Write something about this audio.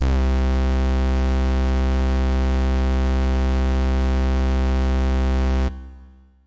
Full Brass C2
The note C in octave 2. An FM synth brass patch created in AudioSauna.
brass, fm-synth, synth, synthesizer